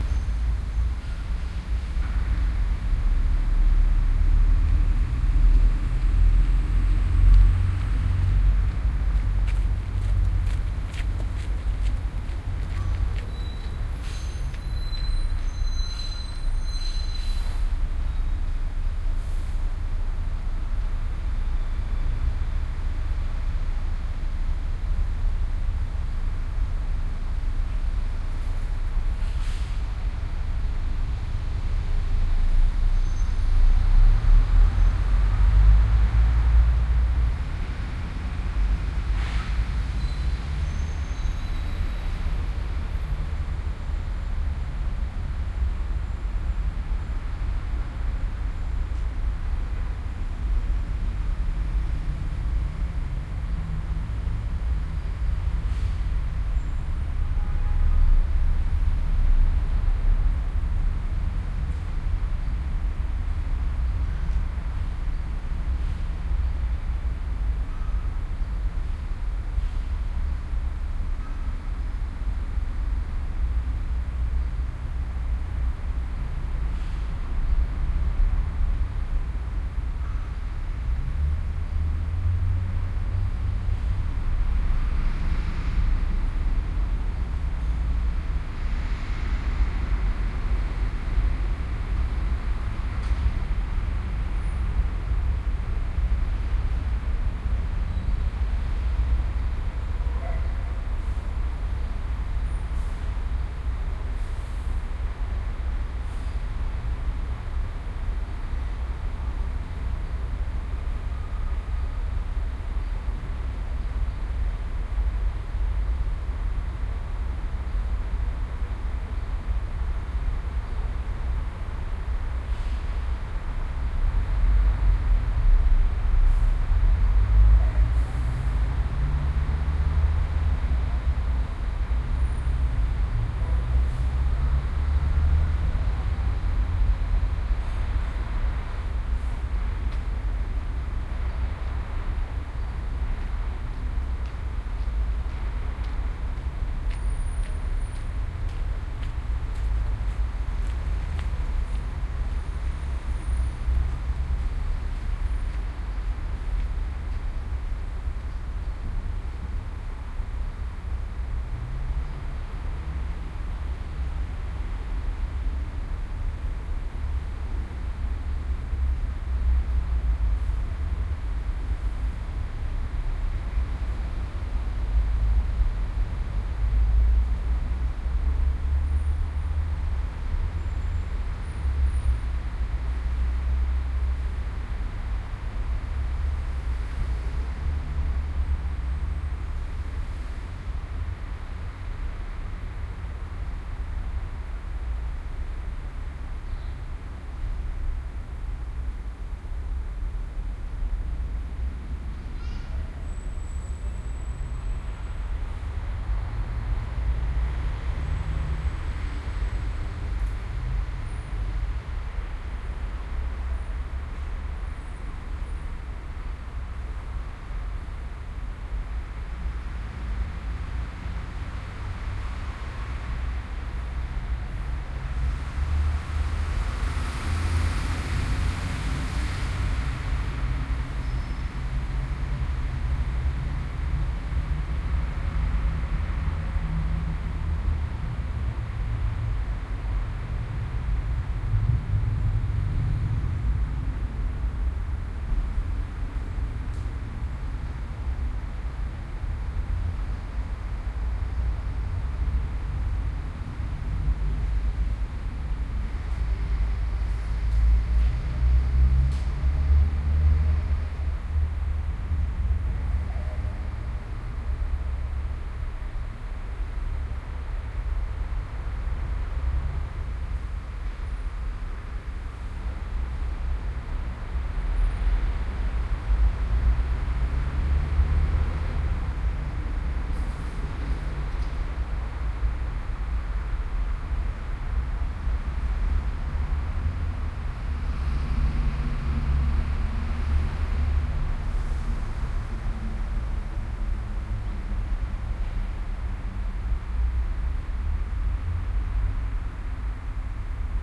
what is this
This is track two, recorded in August 2007 in Hannover / Germany on the same day, using the Soundman OKM II studio, the A 3 adapter and Sharp Minidisk MD-DR 470H recorder, with some people walking by and brilliant city noises.